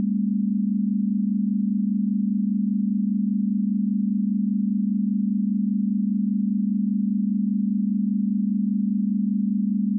base+0o--3-chord--02--CDF--100-70-30
test signal chord pythagorean ratio
chord, pythagorean, ratio, signal, test